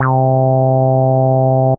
A 303 Sample i sampled from my tb303Workes great with only this sample in reason fl studio and so on
303, wave, c1